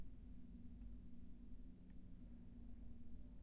bathroom ventilation
Ventilation in a bathroom.